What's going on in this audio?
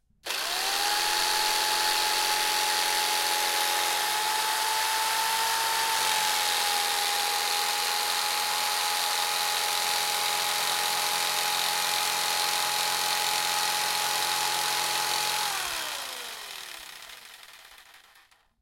04-5 Straightforward Saw
CZ, Czech